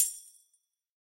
(c) Anssi Tenhunen 2012
edited, multihit, samples, tamborine, tambourine